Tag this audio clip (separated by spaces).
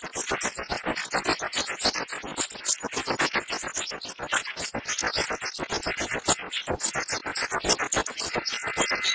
electronic meteors space